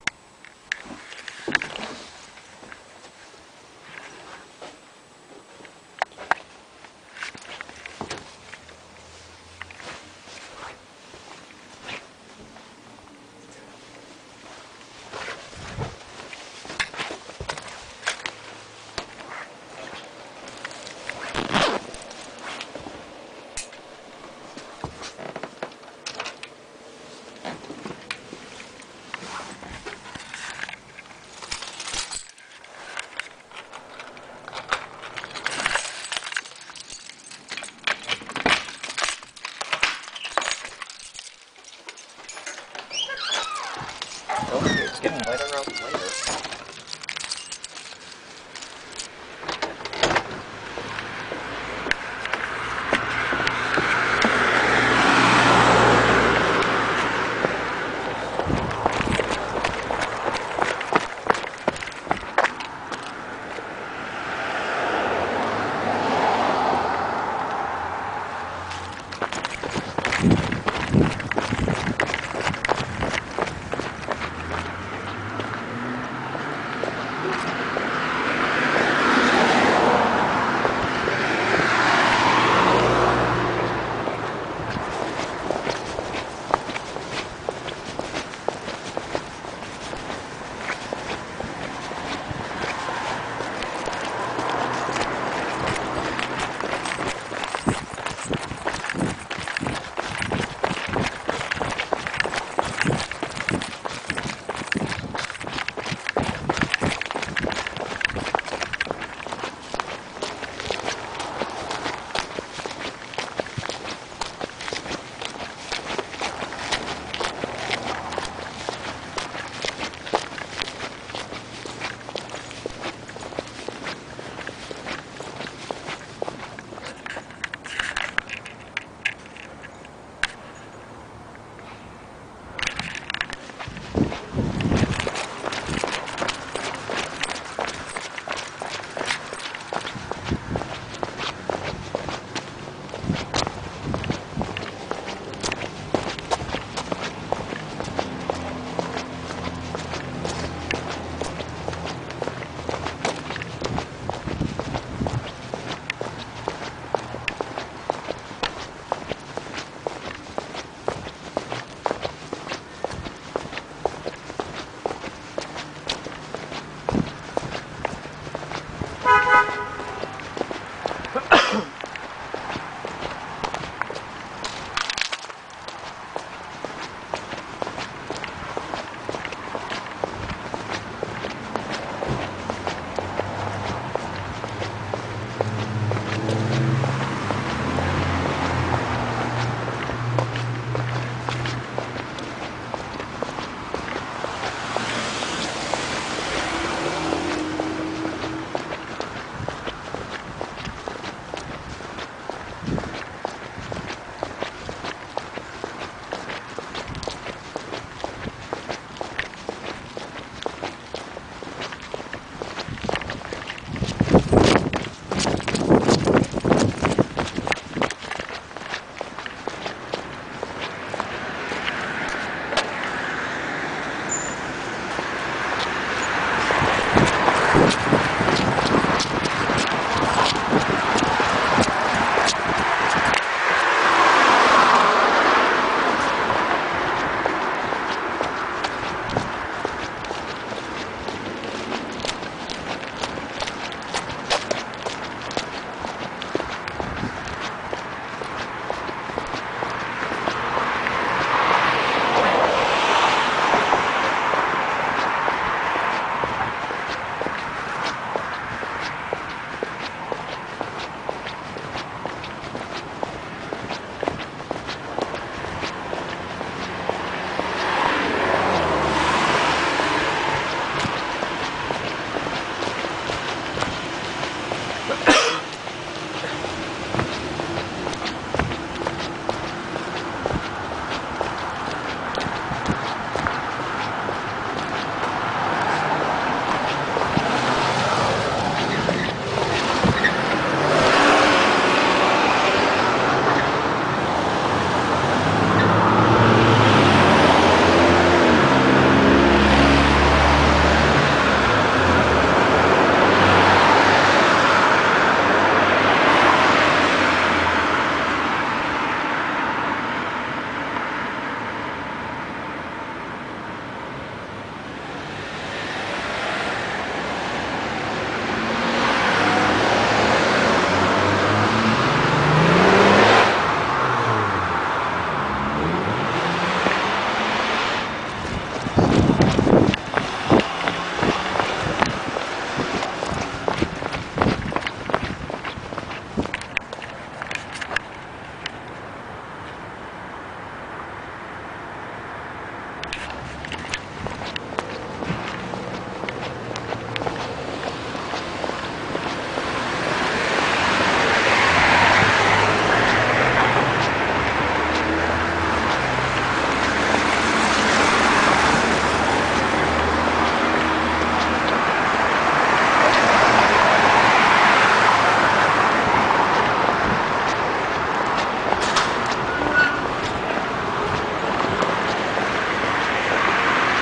Mono recording I took from my digital camera. Surprisingly good quality. I was in transit from my apartment to the downtown district.